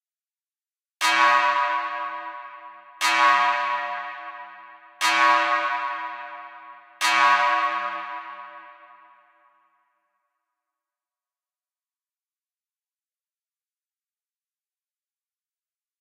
A part of the Metallic Chaos loop.